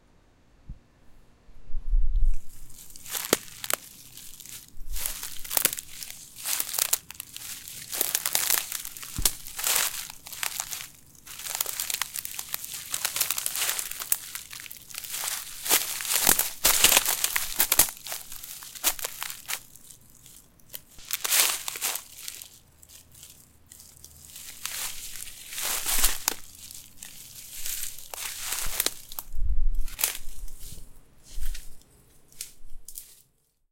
Walking on the forest crushing twigs and leaves .